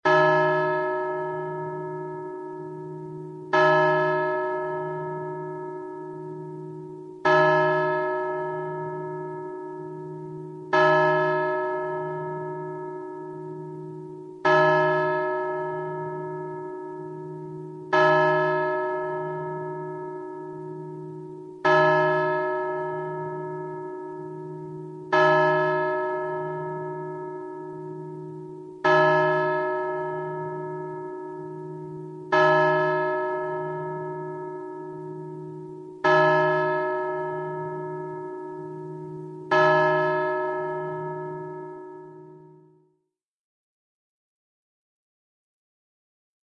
12 Noon Hour Bell Strike
This is a bell striking noon. Use this for a Big Ben scene in a video production. You can also cut it so that it rings 1, 2, 3, and so on.
Bells, Carillon, Church